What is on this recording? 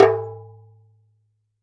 Doumbek Tek
Doumbek One-Shot Sample
doumbek, drum, hand, percussion